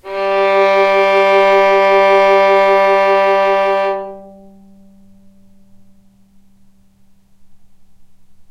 violin arco vib G2
violin arco vibrato
violin, vibrato, arco